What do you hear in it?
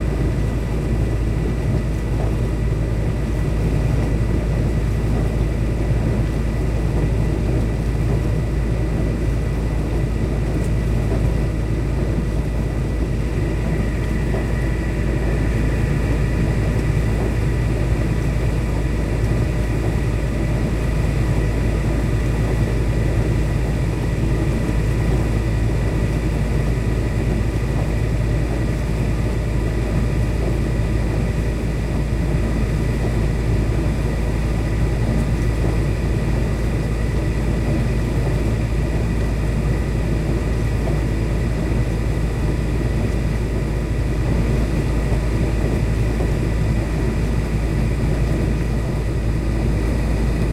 dishwasher under
tascam recording of dishwasher from space under the dishwasher
kitchen-appliances, kitchen